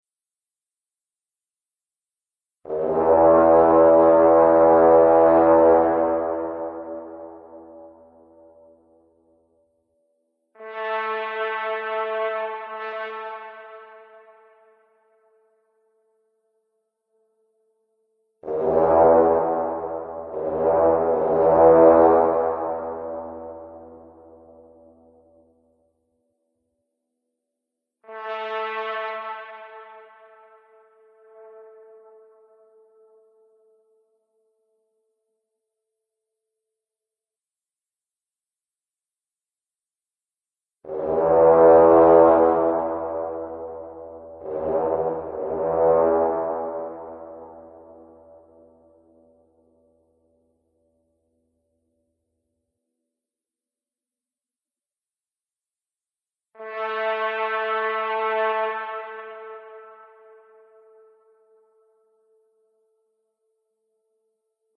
multiple siren
siren sound made with synth and reverb fx in Reason. Initially made as two distant posts communicating with each other
air, ambient, atmosphere, communication, dark, horn, raid